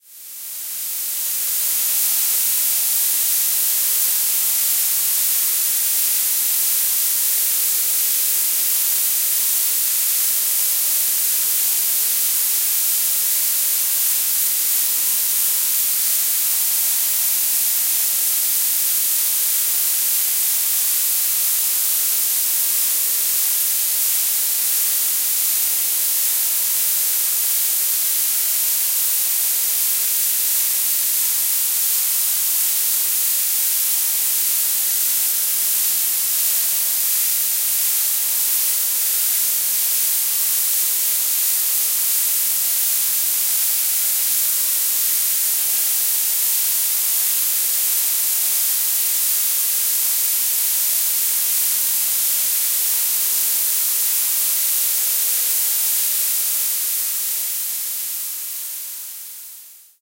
This sample is part of the "Space Drone 3" sample pack. 1minute of pure ambient space drone. Rainy atmosphere.
drone, reaktor, soundscape, space